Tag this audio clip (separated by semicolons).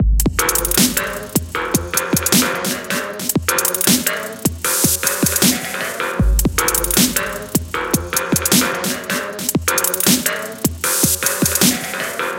Dubby; Drums; Full